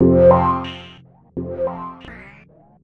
Good day. This short sound make by Synth1. Hope - you enjoy/helpful
effects, fx, gameaudio, gamesound, sfx, sound-design, sounddesign